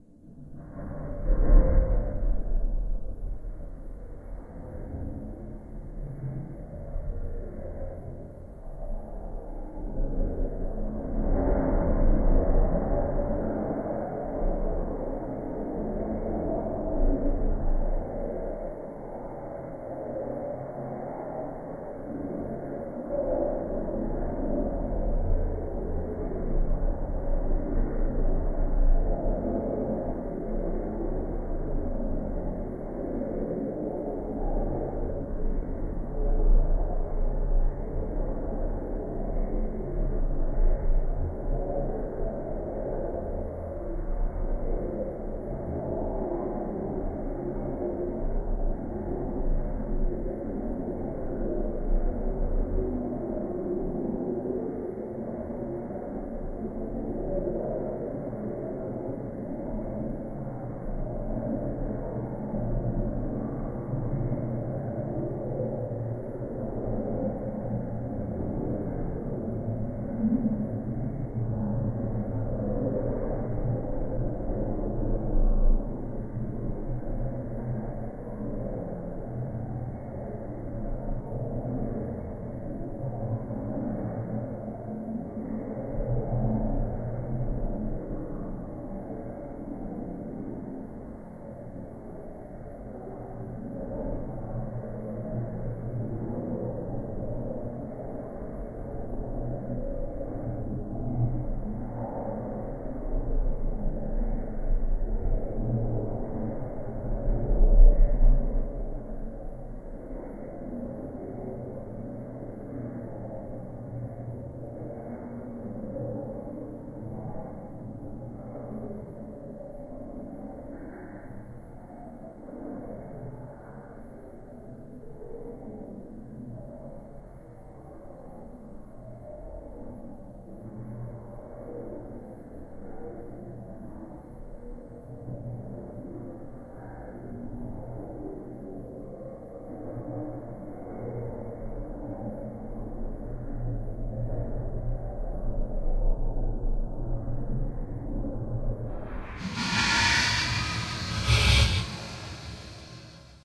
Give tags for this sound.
Atmosphere; Halloween; Freaky; Terror; Evil; Scary; Horror

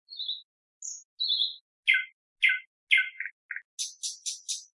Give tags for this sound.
bird; birds; birdsong; field-recording; forest; nature; nightingale; sing; singing; song; summer; thrush; tweet; tweeting; woods

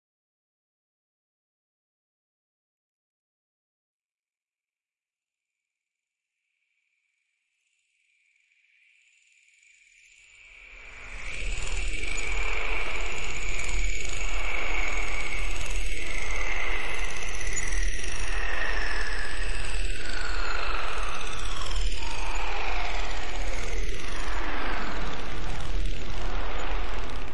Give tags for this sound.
effect; sfx; abstract; fx; sound-design; future

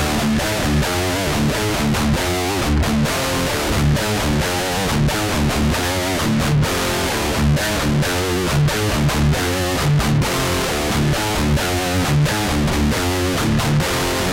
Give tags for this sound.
13THFLOORENTERTAINMENT; DUSTBOWLMETALSHOW; GUITAR-LOOPS; HEAVYMETALTELEVISION

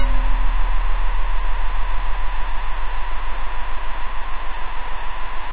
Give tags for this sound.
chaos-theory; noise; additive; mandelbrot; harmonics; synthesis

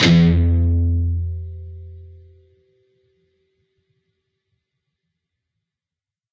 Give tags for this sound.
guitar; distorted; chords; rhythm-guitar; distorted-guitar; guitar-chords; rhythm; distortion

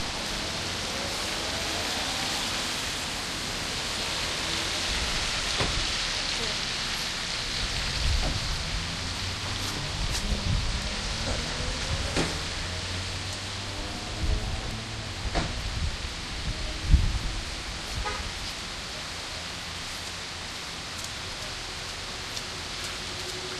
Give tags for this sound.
ambience; field-recording

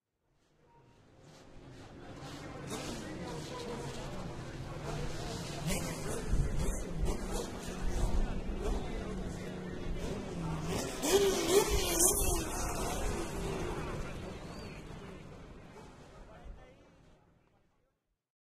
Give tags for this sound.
car; engine; field; recording; zoomh4

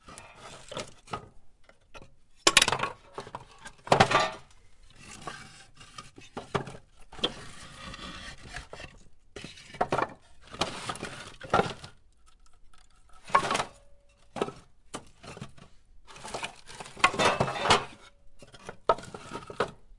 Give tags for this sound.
firewood; pieces; tree; wood

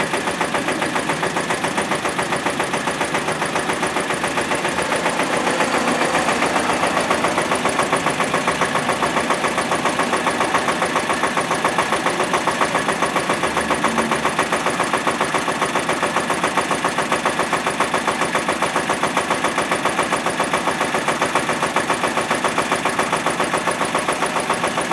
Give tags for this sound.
rhythmic; motor; street; excavator

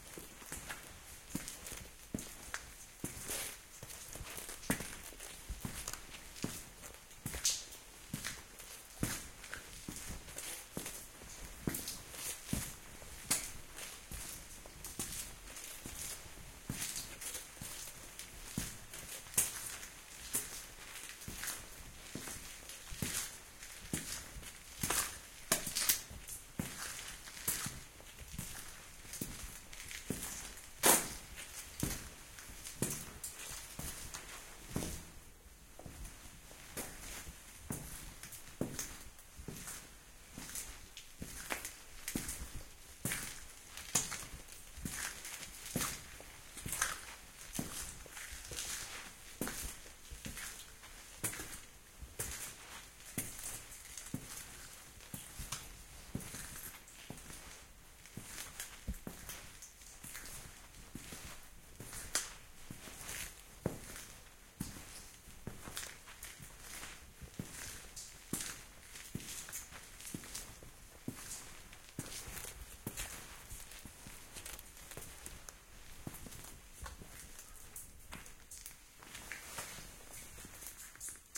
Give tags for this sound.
cave; environment; field-recording; footsteps

